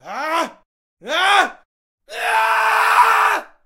Screams of Frustration
Voice acting.
A vociferous call for attention because of frustration. Though the last shout could also be used for showing real pain. It was so loud I actually had to cover my own ears doing this.
loud, funny, pain, screaming, frustration, man, frustrated, scream